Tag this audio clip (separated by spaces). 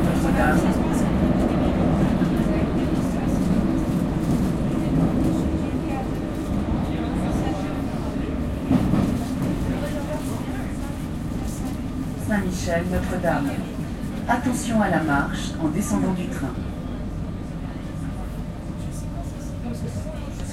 train transportation voices